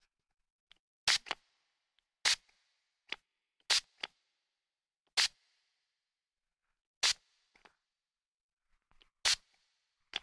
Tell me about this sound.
Aerosol Spray.R
The sound of an aerosol spray bottle being sprayed
sound-effect, Spray, Spritz